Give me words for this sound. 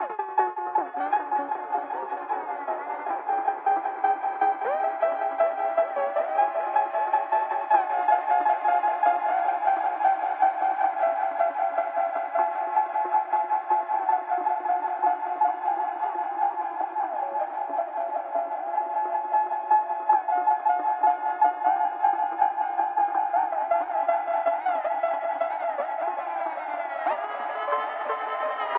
This is a 16 Bar Loop. The last 2 Bars offer the use for a bridge or a slicing ( even the complete Loop can be rearranged ( Step Sequenced, Gatede or whatever ) .... It´s a fundamental Part of an acutal DnB Project i finished...